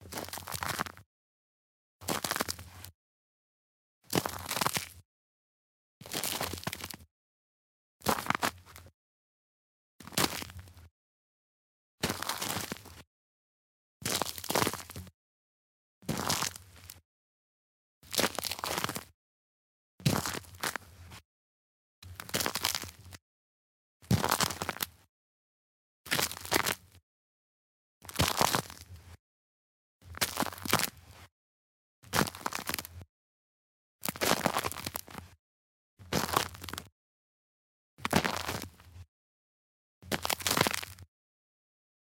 Long Length Walk Snow
Careful/slow walking on a gravel path with little, fresh but cleared Snow, close mic.
Recorded on a Zoom H2 with internal Microphone, slightly Processed with EQ and Compression for closer feel, Compiled from Long Recording.